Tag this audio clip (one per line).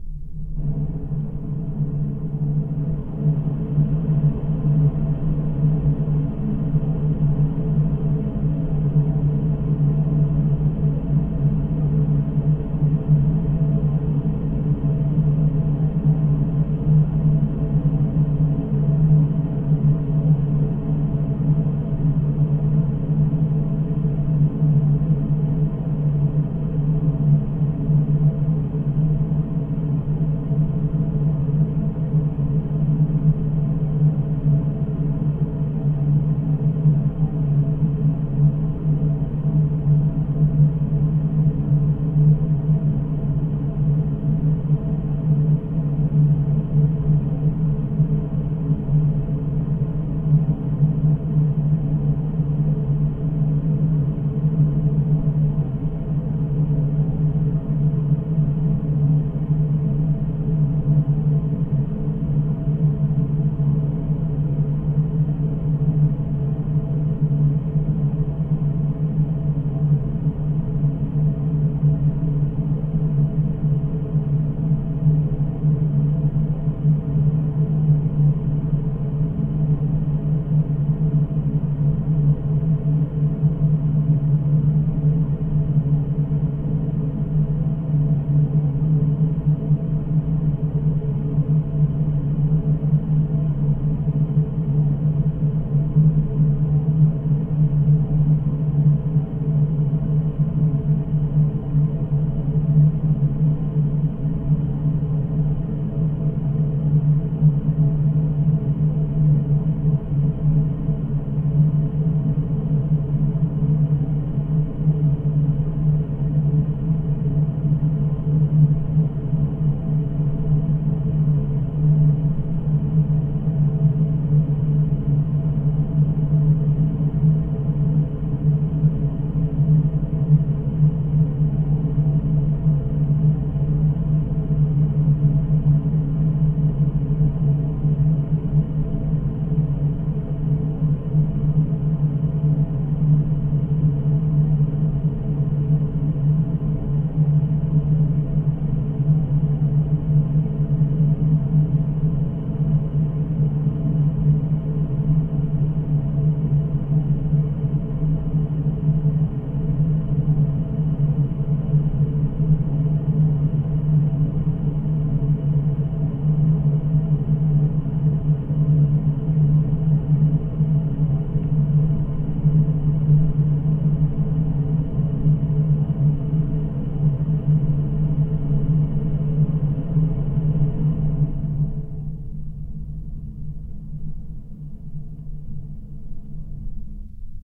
ambience
ambient
bath
bathroom
contact-mic
contact-mircorphone
geofon
lom
PCM-A10
recording
shower
sony
splash
spraying
toilet
water